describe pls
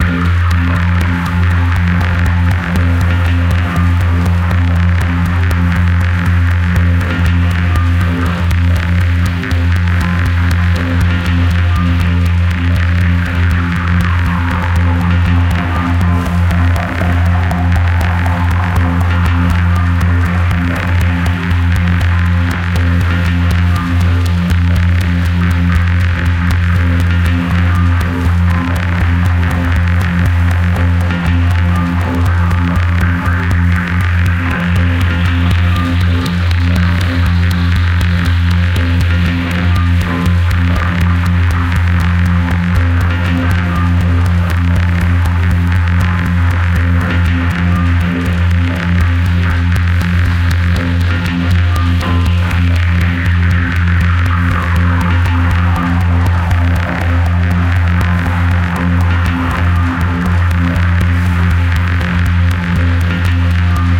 rhythmic dronescape 1 60bpm
a long rhythmic drone loop